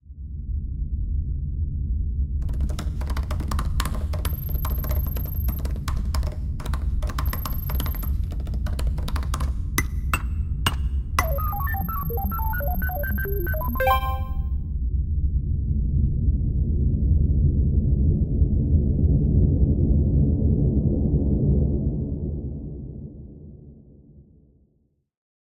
The sound of commands and coordinates being entered into the spaceship's control panel, and the ship changing course as a result.
typing, command, electronic, science-fiction, keyboard, control-room, technology, futuristic, enter, sci-fi, coordinates